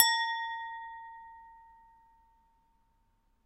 Wine glass clink
Jingle sound of Czech crystal wine glass
glass, spoon, jingle